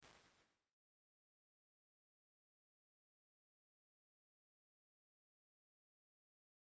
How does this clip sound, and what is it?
QV Hall dec20 diff7
Quadraverb IRs, captured from a hardware reverb from 1989.
convolution, FX, impulse-response, IR